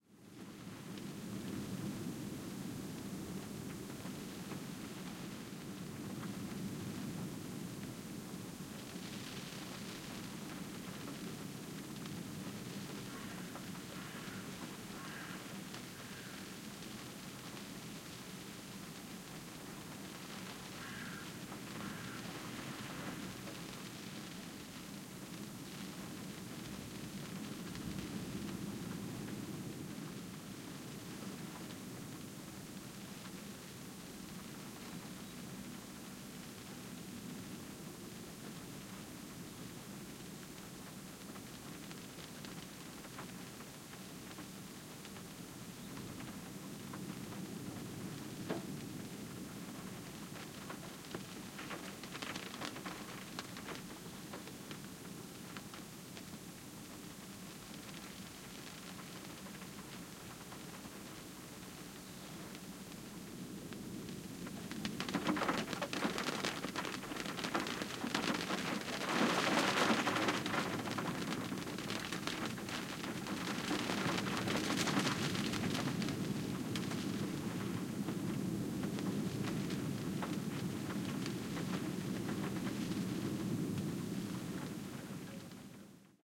Wind and Rain From Inside a Car
Wind and rain heard from inside a stationary car. A crow and some wind chimes can be heard occasionally in the background.
Recorded with a matched pair of Uši Pro microphones on a small tripod attached to a Zoom H5. The recording is in stereo.